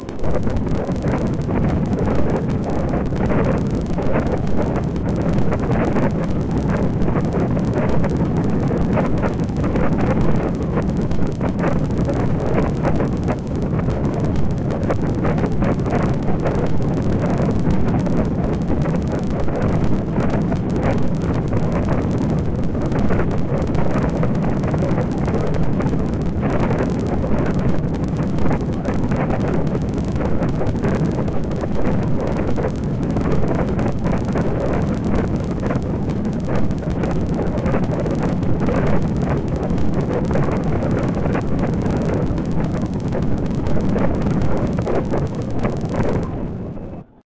I recorded my acoustic guitar with an old dictaphone and sampled that
directly to my Casio SK-1.
and processed the file in Soundforge. I gappered the file (adding a lot of silent passages at a certain frequency) and pitched it down. Also added some reverb.
Mixed the file with a distorted version of the file using the cyanide2
plug-in. After that I mixed the file with another file, which I made by
drawing very short waves followed by silence (ticking and clicking
sounds).
What you hear is a distorted mush of flames, flickering in the wind and popping and ticking sounds.
clicks, distorted, fire, guitar, processed